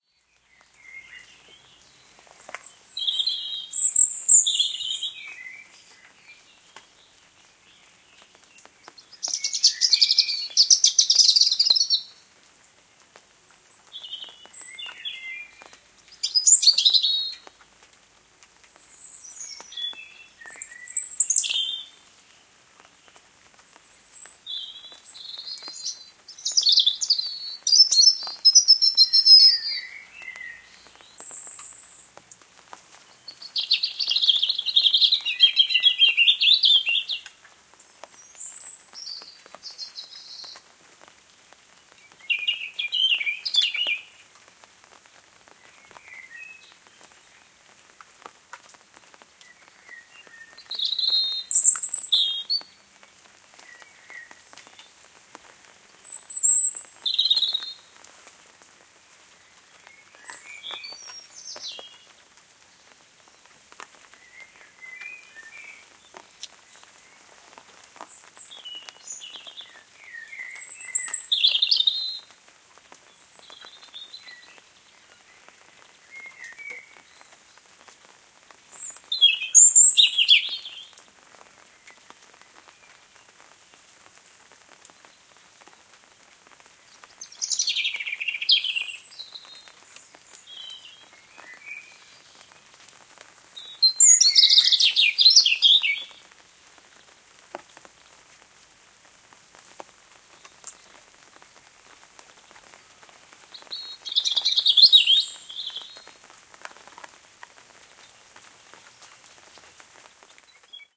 Birdsong In The Rain
A stereo field-recording of birdsong during a light shower of rain.The heavier plops are drips from a nearby tree. Rode NT-4 > FEL battery pre-amp > Zoom H2 line-in under an umbrella.
bird, birds, field-recording, rain, singing-in-the-rain, stereo, umbrella, xy